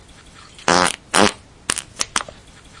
fart poot gas flatulence flatulation explosion noise
explosion, fart, flatulation, flatulence, gas, noise, poot